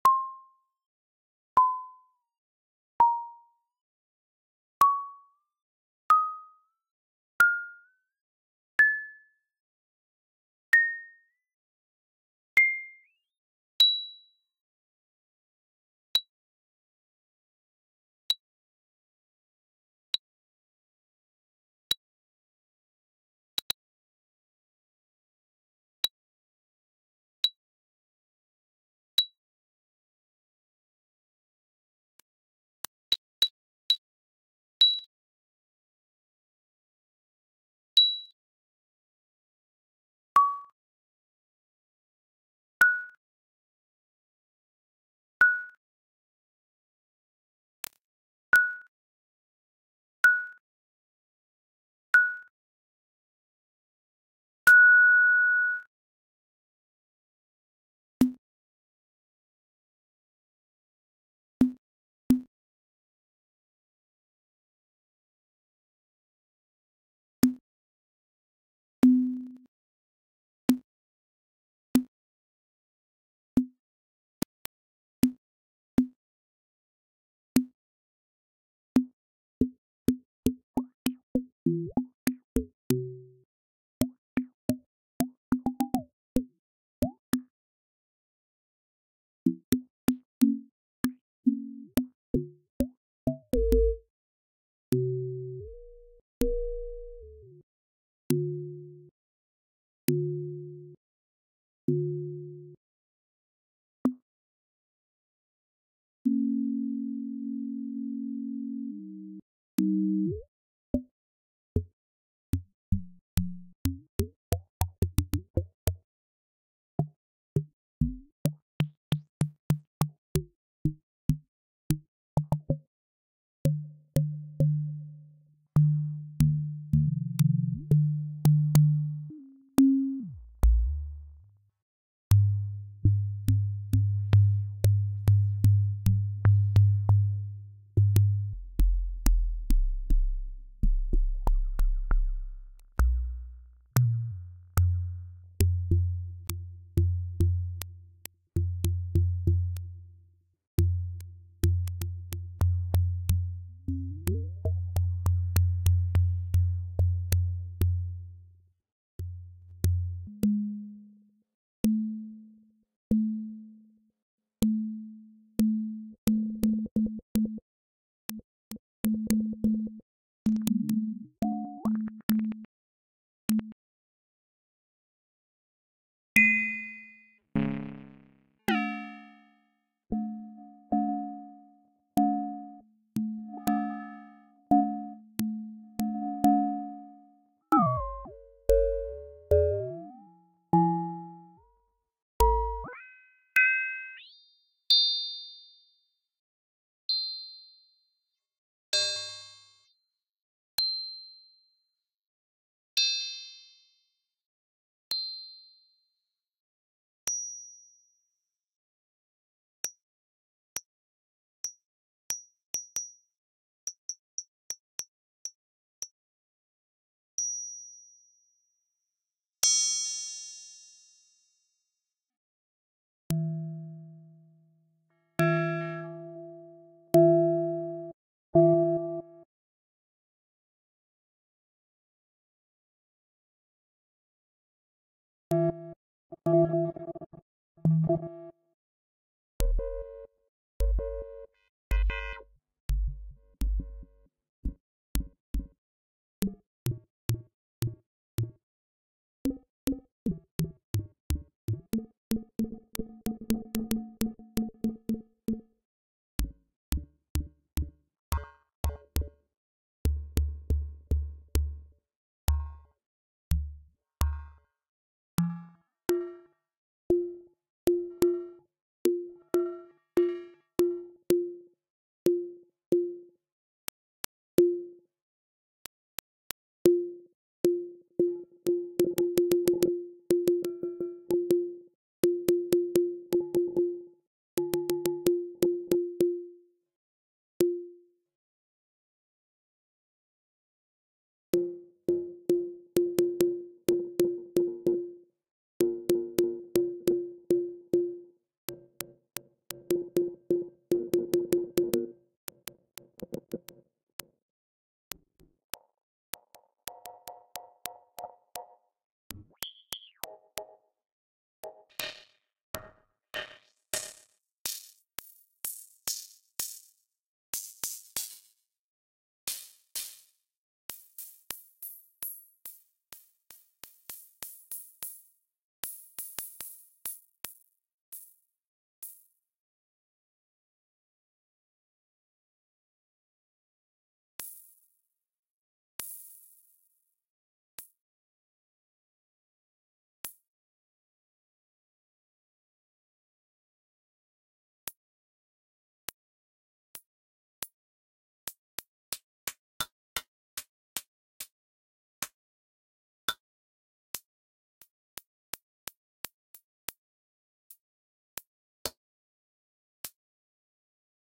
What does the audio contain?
percussion made in BLOK modular. Kind of morphs around but plenty of bongos and some kind of shaker towards the end. You can maybe get a couple of claps out of the end part too. Sometimes sounds a little too FM-y (think Sega Genesis sounds) but others sound pretty organic imo